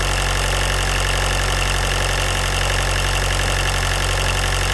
engine One level 6
Diesel engine revving
engine, racing, revving